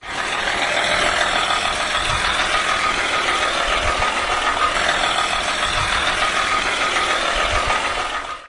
26.08.09: Wielka street in the center of Poznan. The taxidriver is waiting on some client.
car, taxi, street, noise